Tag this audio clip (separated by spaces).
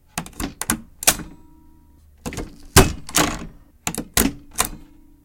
stapler stapling